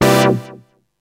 Chord recorded with Fantom G as audio interface